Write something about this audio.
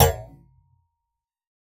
BS Hit 8
metallic effects using a bench vise fixed sawblade and some tools to hit, bend, manipulate.
Sawblade, Hits, Hit, Bounce, Metal, Sound, Clunk, Dash, Thud, Effect